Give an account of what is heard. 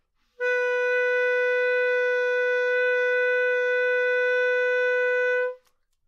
Clarinet - B4 - bad-richness-gaita
Part of the Good-sounds dataset of monophonic instrumental sounds.
instrument::clarinet
note::B
octave::4
midi note::59
good-sounds-id::2228
Intentionally played as an example of bad-richness-gaita